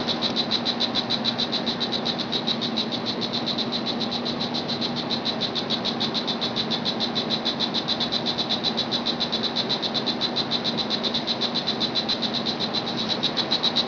FL insects coastalwetlandnearIRL daytime7.22.2013
Insect sounds during midday in an area slightly upland (live oak and cabbage palm habitat) to a coastal wetland near the Intracoastal Waterway, on the east-coast of FL. Recorded with iPhone 4S internal mic and amplified by ~20dB using Audacity.